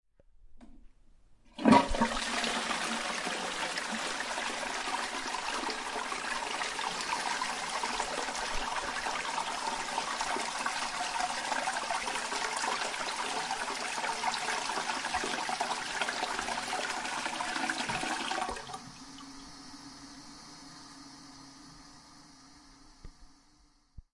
The sound of a toilet flushing. Recorded using onboard microphones of the Zoom H1 handy recorder.